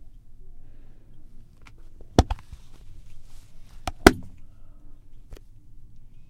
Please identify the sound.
CAIXINHA DE OCULOS
microfone condensador, cardióide/ fonte do som: pessoa abrindo e fechando uma caixa de óculos.